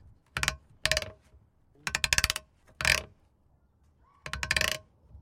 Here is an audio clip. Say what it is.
plastic gas container cap screw back on ratchet twist2
back, cap, container, gas, plastic, ratchet, screw, twist